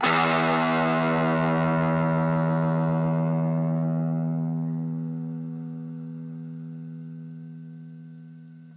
Two octaves of guitar power chords from an Orange MicroCrush miniature guitar amp. There are two takes for each octave's chord.
amp, chords, distortion, guitar, miniamp, power-chords